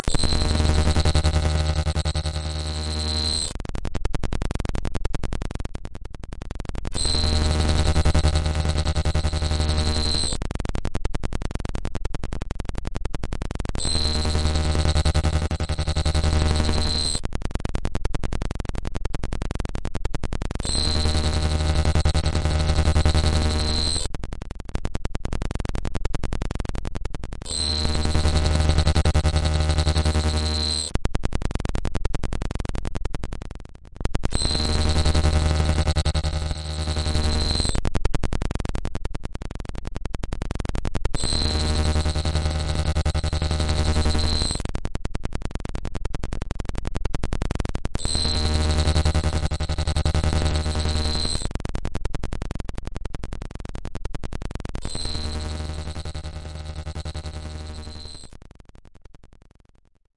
negatum-4b5d25cb-s
Genetic programming of sound synthesis building blocks in ScalaCollider, successively applying a parametric stereo expansion.